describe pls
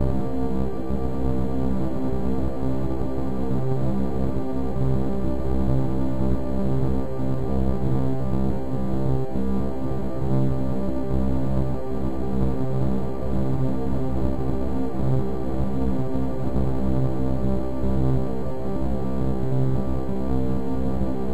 Digi Death
fx, harsh